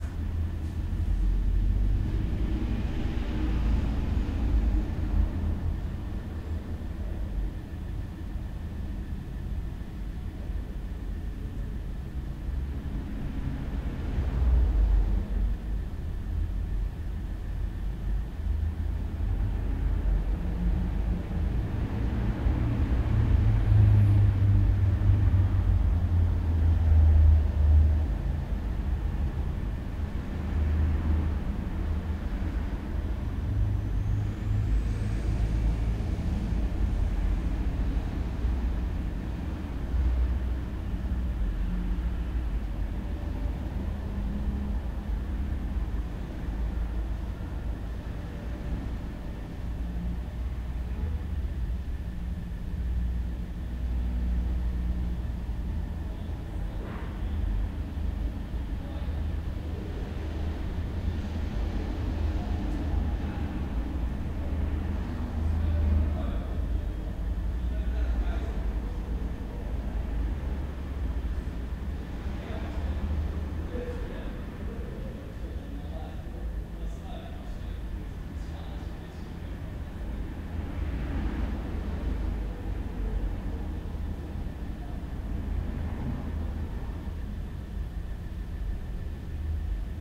Staircase Ambience INT Gdn Floor Hum Traffic
This is a recording of the ambience in the staircase of a building in which my studio is located. Very nice hum, some people talking, traffic and background noises.